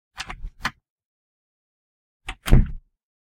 Foley Opening & Closing Bedroom Door
bedroom, camera, can, car, common, door, film, foley, foot, garage, house, household, jump, kodak, light, step, switch, trash, trash-can